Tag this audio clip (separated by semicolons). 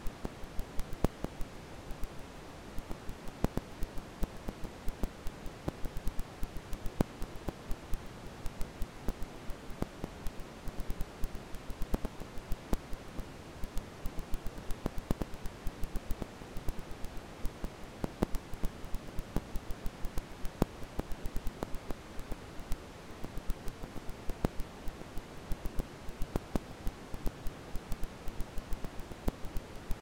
crackle; hiss; record; rpm; vinyl; warp; wear